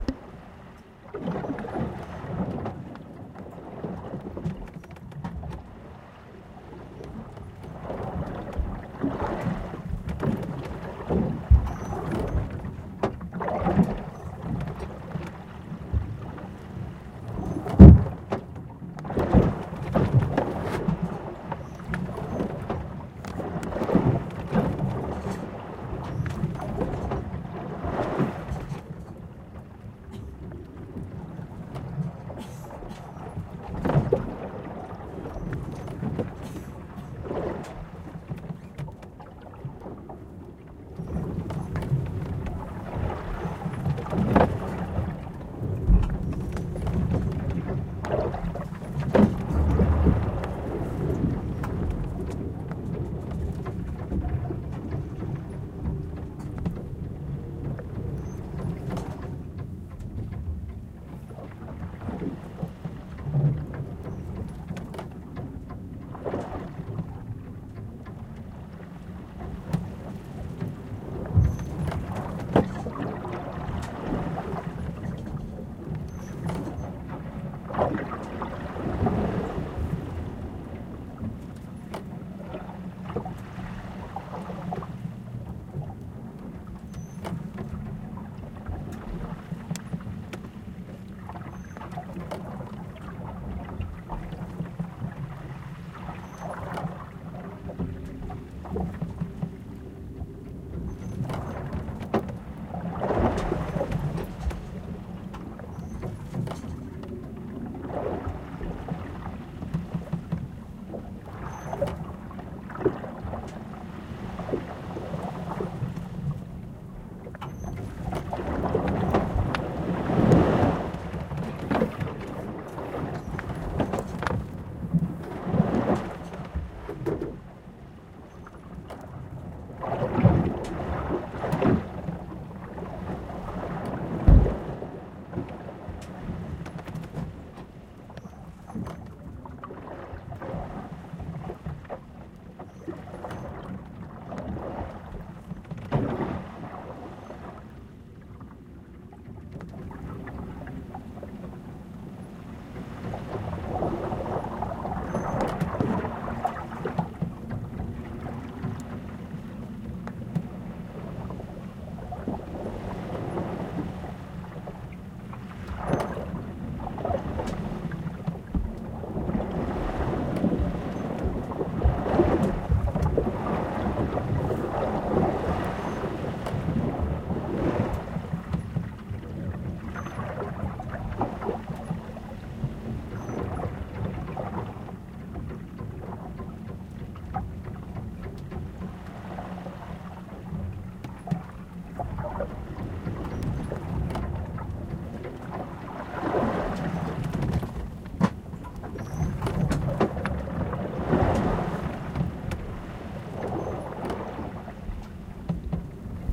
This is the sound from my bunk sailing across the atlantic on a sailing ship.